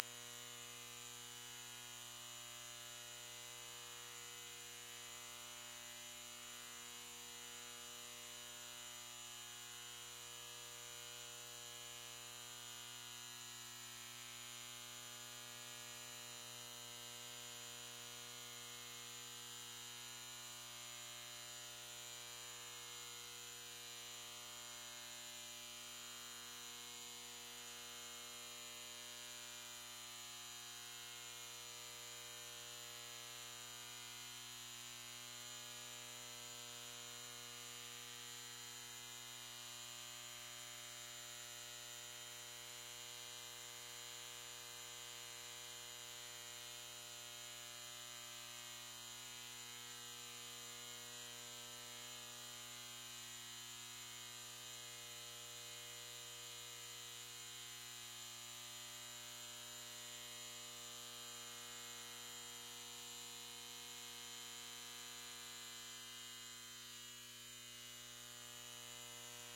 neon light thin buzz nice balanced
recorded with Sony PCM-D50, Tascam DAP1 DAT with AT835 stereo mic, or Zoom H2
balanced, buzz, light, neon, nice, thin